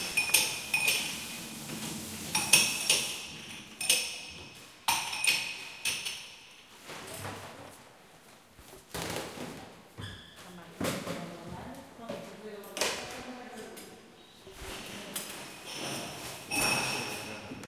SonicSnap JPPT5 KitchenGlasses
Sounds recorded at Colégio João Paulo II school, Braga, Portugal.
glasses, Joao-Paulo-II, kitchen, Portugal